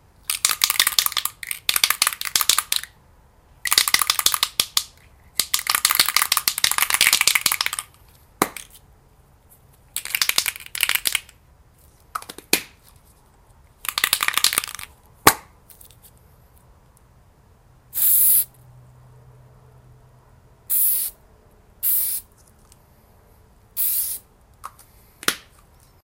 Spray paint can recorded with a the Olympus DS-40.
ambient, atmosphere, outdoor, paint, patio, spray